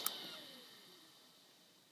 This is the sound of an old MSI computer shutting down. This sound has been recorded with an iPhone4s and edited with gold wave.